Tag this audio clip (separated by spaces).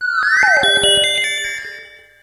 noise synth